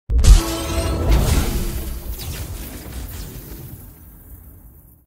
Doctor Strange Magic Circle Shield Sound Effect made from FL software copying the ones from the marvel movies